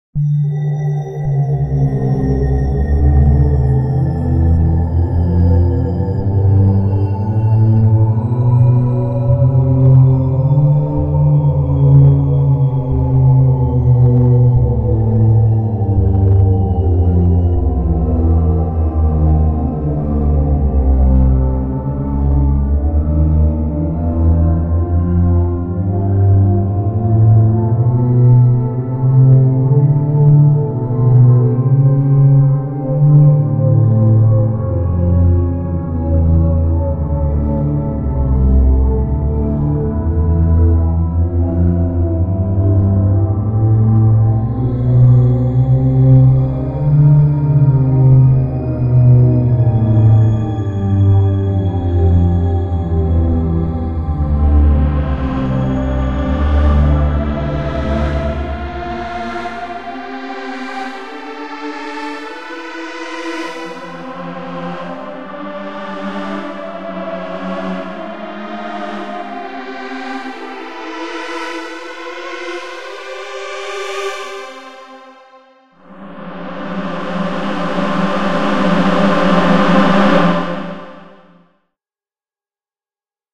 Mysterious Horror Theme Song
An Intense Mystery & Horror themed song for to be used in any project.
If you are planning to use it I would really aprreciate credits <3